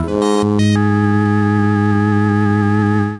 PPG 010 Little Mad Dance C4
This sample is part of the "PPG
MULTISAMPLE 010 Little Mad Dance" sample pack. It is a digital sound
with a melodic element in it and some wild variations when changing
from pitch across the keyboard. Especially the higher notes on the
keyboard have some harsh digital distortion. In the sample pack there
are 16 samples evenly spread across 5 octaves (C1 till C6). The note in
the sample name (C, E or G#) does not indicate the pitch of the sound
but the key on my keyboard. The sound was created on the PPG VSTi. After that normalising and fades where applied within Cubase SX.
multisample, digital, experimental, harsh, melody, ppg